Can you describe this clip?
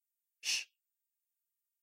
boy/girl saying sh, made for video games or any use.
Kinda sounds like a girl from MadFather